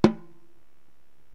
hi rack tom rock
My highest tom with total muffle-age, lol.
recording, rock, tom